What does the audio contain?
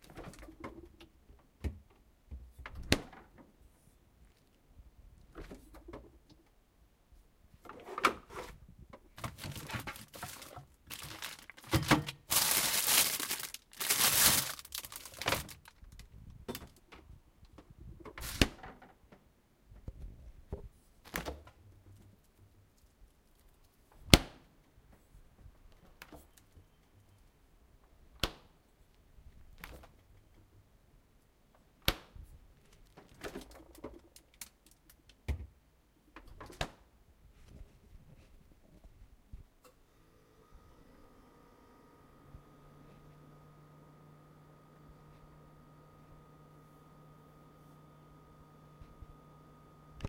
I open the refrigerator door rummage around a bit and close the door. Soon after, the hum of the cooling motor turns on.